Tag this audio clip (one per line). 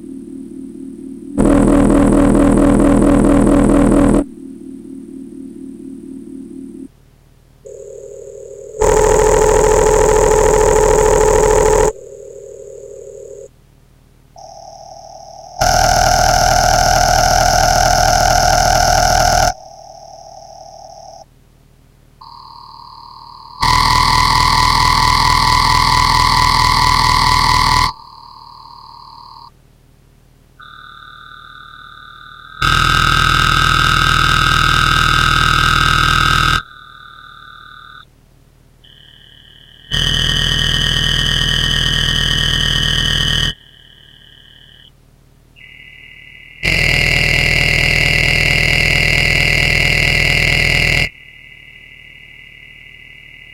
Synthesizer
Kulturfabrik